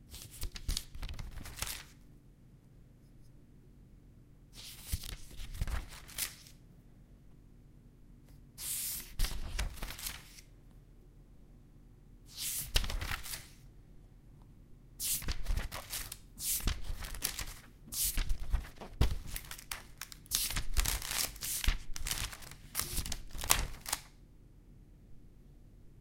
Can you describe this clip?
Paper turning 2
More paper being turned. Good for office sound effects.
foley, office, office-sounds, Paper, rustle, turning